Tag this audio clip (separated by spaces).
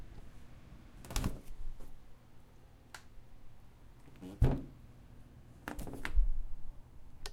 closing fridge opening